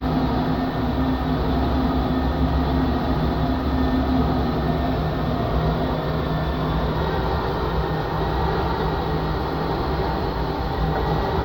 distant nature noise
A strange sound from somewhere off in the distance, captured during a field recording then isolated and amplified.
Recorded 01/24/2017